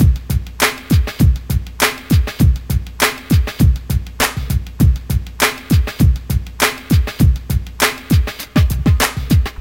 The beat I already uploaded:
But sequenced in the same way as the track where I used it:
It includes the funky drummer breakbeat, originally made by Clyde Stubblefield for James Brown.